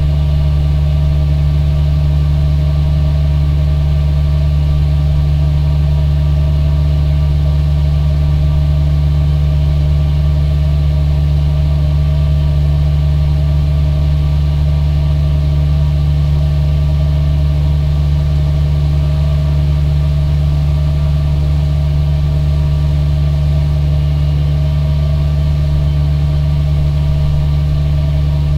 generator rumble
Recording of a large pump. Raw except for normalization.
ambient, generator, heavy, industrial, machinery, mechanical, pump, rumble, whir